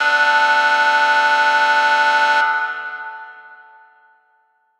FL studio 12
VSTI: 3x Osc
Tone: E5+E6
Tempo: 100
Maximus: default
Reeverb: for strings
7 Band EQ - cut low
FL parametric EQ - ah2